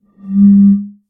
Some sounds of blowing across the top of a glass bottle.
Specifically a 33cl cider bottle.:-)
Captured using a Rode NT5 small-diaphragm condenser microphone and a Zoom H5 recorder.
Basic editing in ocenaudio, also applied some slight de-reverberation.
I intend to record a proper version later on, including different articulations at various pitches. But that may take a while.
In the meanwhile these samples might be useful for some sound design.
One more thing.
It's always nice to hear back from you.
What projects did you use these sounds for?